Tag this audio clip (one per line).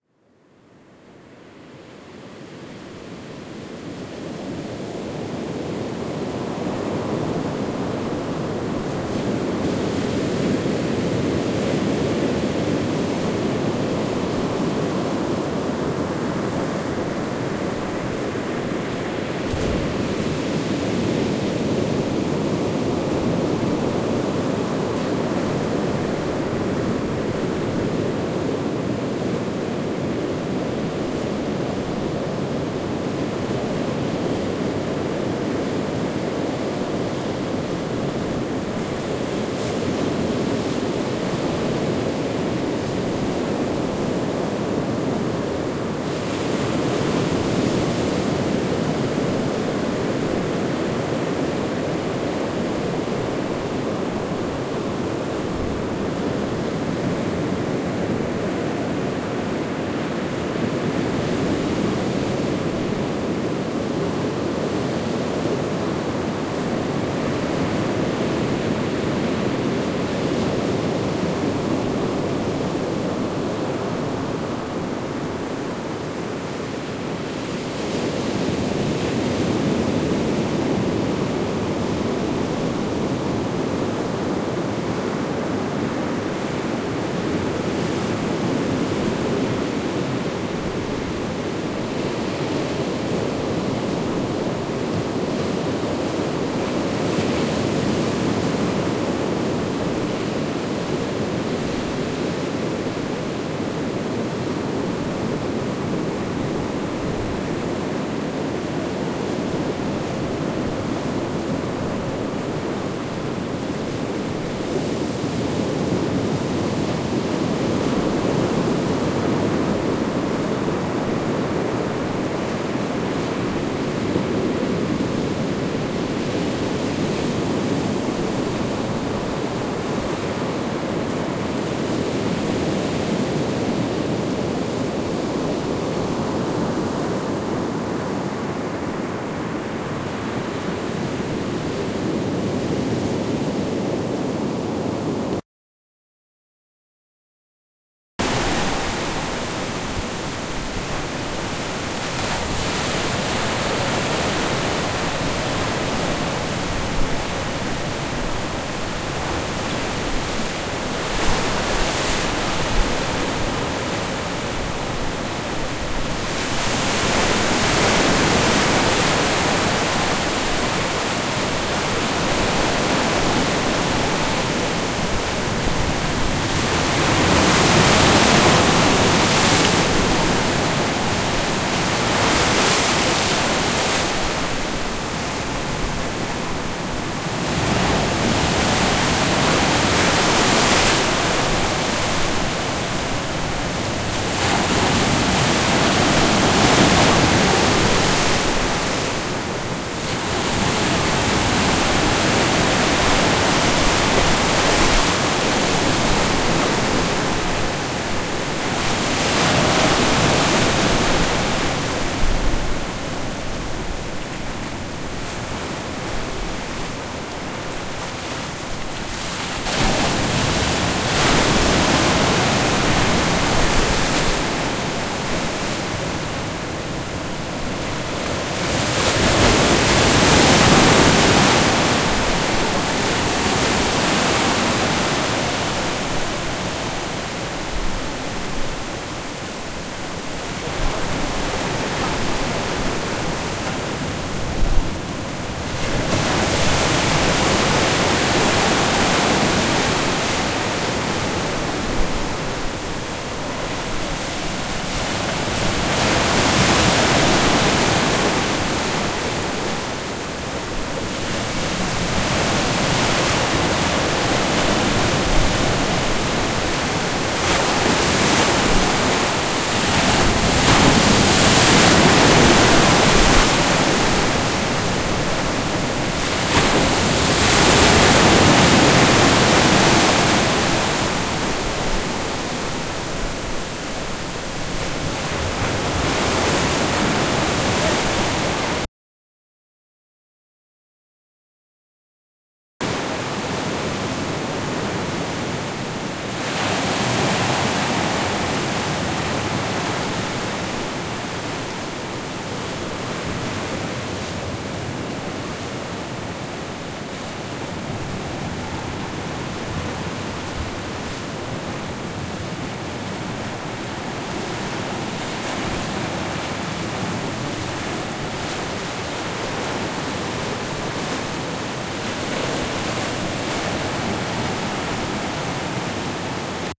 water
field-recording
waves
beach
ocean